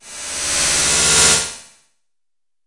Electronic musquitos C5
This sample is part of the "K5005 multisample 18 Electronic mosquitoes"
sample pack. It is a multisample to import into your favorite sampler.
It is an experimental noisy sound of artificial mosquitoes. In the
sample pack there are 16 samples evenly spread across 5 octaves (C1
till C6). The note in the sample name (C, E or G#) does not indicate
the pitch of the sound. The sound was created with the K5005 ensemble
from the user library of Reaktor. After that normalizing and fades were applied within Cubase SX.
mosquitoes, multisample, noise, reaktor